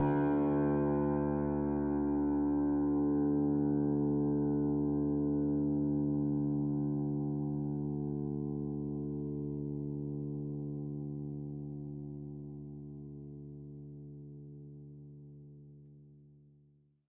a multisample pack of piano strings played with a finger
multi, strings, piano